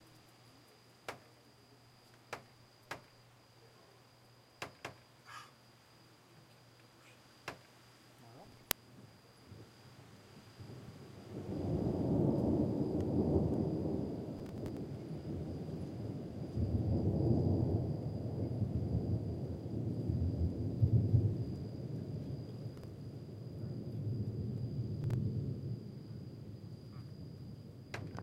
recorded outside my house with Tascom DR-07mkII
panhandle, texas